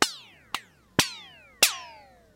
Typical (simulated) sound of a bullet ricochet off a hard surface. Four variations contained in the one file.
bounce, bullet, hit, metal, ping, ricochet